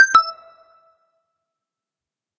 Game-like disable effect
This sound was created to pair with 131660 by Bertroff, "Game Sound Correct." It was created by pitch-shifting in Audacity the "correct" sound.
The intention is to enable the original sound to be used for "turning on" / "enable" sound effect, and this one would be used for the corresponding "turning off" / "disable" sound effect.
sound-effects, video-game, fx, digital, shut-down, incorrect, no, disable, turn-off, game